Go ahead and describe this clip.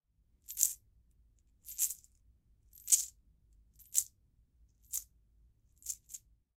coin jangle in hand slow
a handful of change jangling around
change, hand, jingle, coins